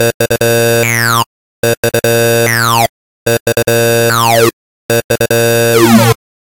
crazy noize toy3
harsh, loop, synth, weird